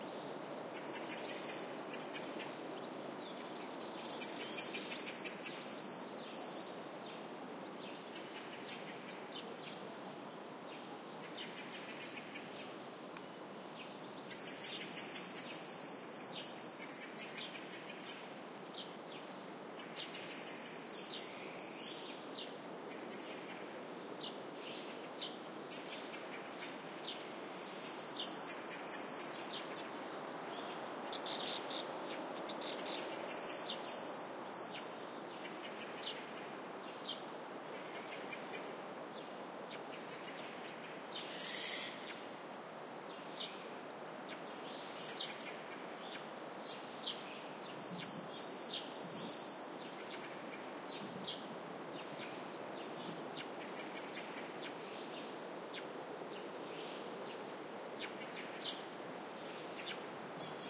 20080528.forest.wind.blackbird
Spring pine forest ambiance, with birds (Great-Tit, Blackbird and Azure-winged Magpie) and wind on trees. except for the Great Tit this perspective of the forest is not joyful. Actually it may sound even sinister as the Blackbird is making the characteristic 'questioning' call only, and the Magpie sounds quite threatening too. Makes you remember there is life, but also death going on there. Sennheiser MKH30+MKH60 into Shure FP24 and Edirol R09 recorder. Recorded near Hinojos, S Pain (involuntary yet meaningful typo I did!) around 11AM under a partly overcast sky and with a temperature low for this site (around 19C)
spring, field-recording, nature, blackbird, forest, great-tit